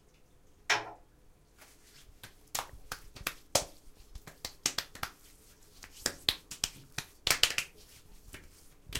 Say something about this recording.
This sound is part of the sound creation that has to be done in the subject Sound Creation Lab in Pompeu Fabra university. It consists on a man havbing a self-massage with aftershave after shaving.